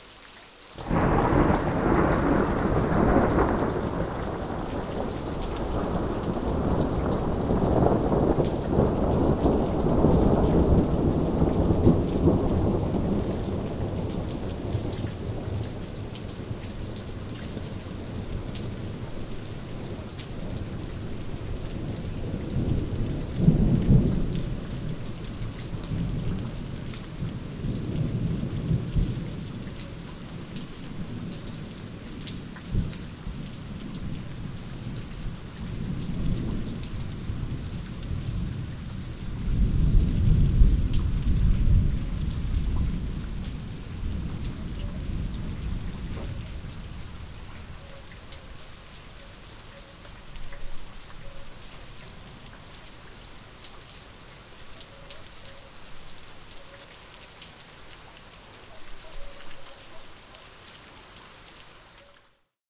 rain session thunder 1min 2007
Clip with sounds of a medium rain and a long, rich sounding thunder roll. Recorded at East Siberia with Oktava 102 microphone and Behringer UB1202 mixer.
field-recording, nature, rain, storm, thunder